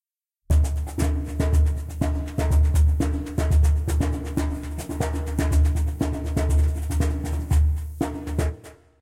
LoFi Djembe Grooves I made, enjoy for whatever. Just send me a link to what project you use them for thanks.
Acoustic, Djembe, LoFi